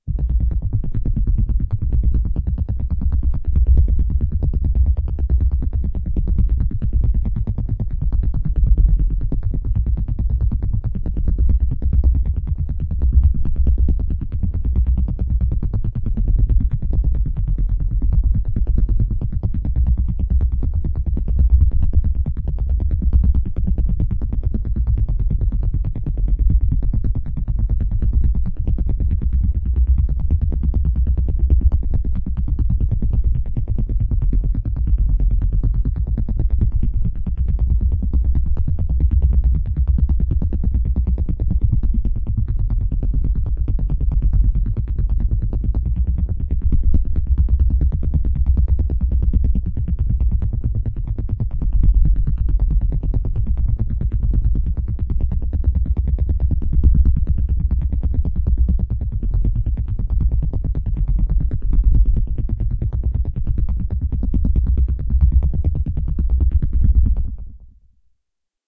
Sounds like a Helicopter but this sound was made on a synth.
C21